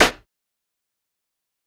SNARE NASS
hits, snare, jungle, fat, dnb, drum, bass